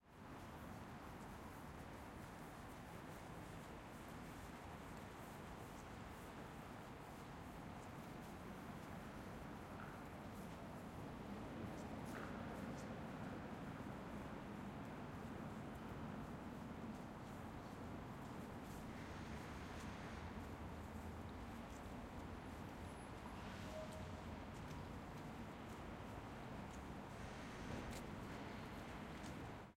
Crowd Noise morning 4
A selection of ambiences taken from Glasgow City centre throughout the day on a holiday weekend,
City, Glasgow, H6n, people, Street, traffic, Walla, Zoom